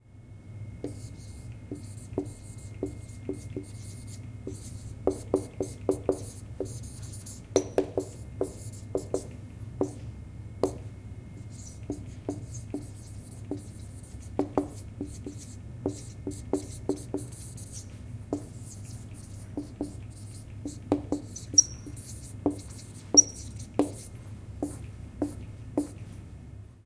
Whiteboard being written on at UPF Communication Campus in Barcelona.
campus-upf, UPF-CS14